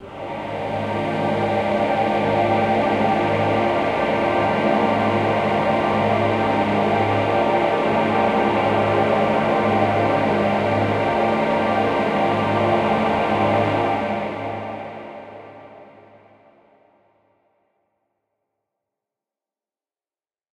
Dramatic choir 2
Deep and dark dramatic choir with alot of disonances. Devilish in it's design. Reminds of a cursed church or something.
choir, dark, deep, disonantic